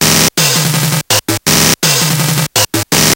Orion Beat 2
LSDJ At its best (well... ) I just bought the thing. Lay off these rythms Kids....
little, my, kitchen, lsdj, nanoloop, today, table, glitch, me, c64, melody, chiptunes, drums, sounds, big